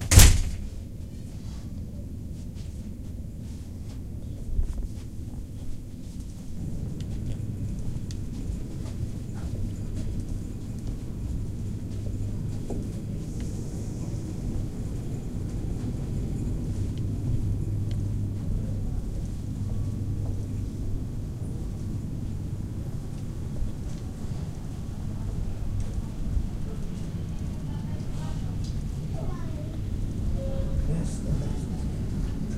ship-interior-1
Laivan sisätila, alussa ovi, lopussa hissin merkkiääni, laivan huminaa, kaukaista puhetta - ship interior, hut door at beginning, elevator arriving tone at end. Ship humming, some far voices recorded with digital compact cassette and moved into CD, used audacity for editing. Place: ship interior 1999 summer